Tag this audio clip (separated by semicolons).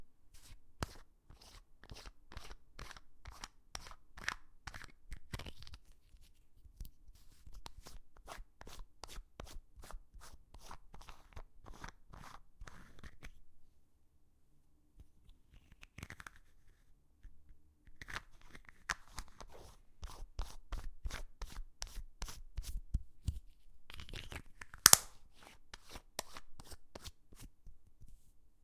screw; contact; open